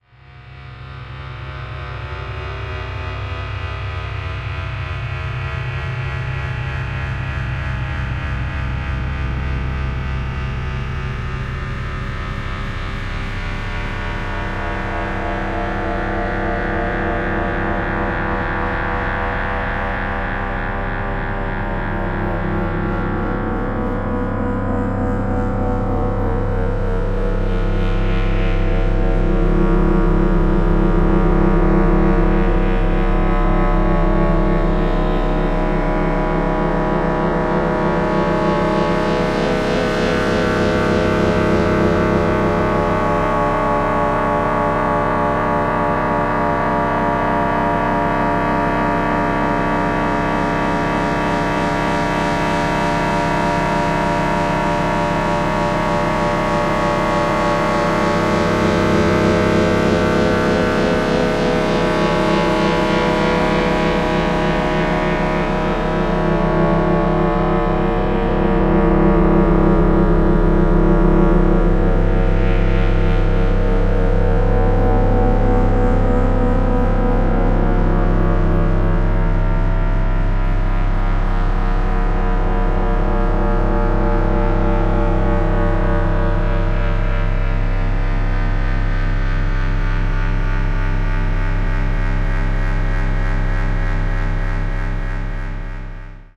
Time Nightmares - 03
Time dilation dilated into concave ambient drone washes.